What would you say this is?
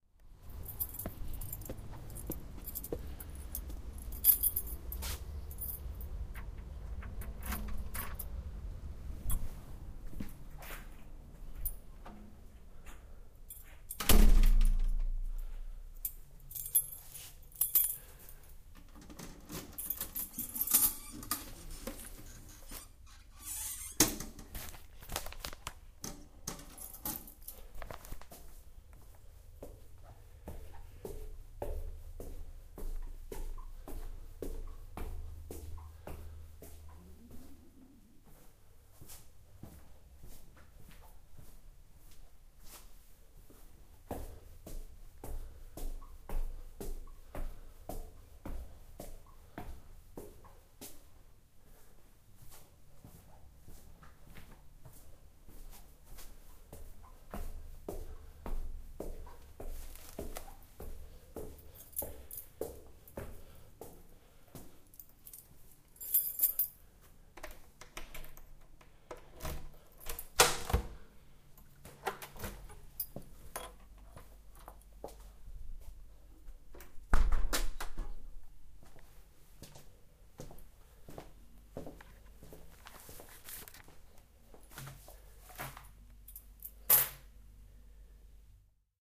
Coming Home 1
Entering my apartment. First I climb the stairs that lead from the street to the door that gives access to the staircase I share with 7 others and find my keys. I unlock the door and open it. I open the mailbox and take the eveningpaper out before closing it again. I climb the stairs to the third floor where I open the door to my apartment. I close it again and walk into my livingroom throwing the keys on the table. I wear those Clarks shoes with very soft soles and corduroy jeans. Recorded with an Edirol-R09.
body, footsteps, noise, stair-climbing